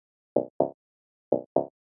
short key loop
Loop at 125 beats per minute of short electronic pitched synthesizer sound.